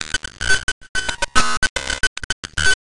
Join this:-)
like a RAW data header file. noise
beats, japan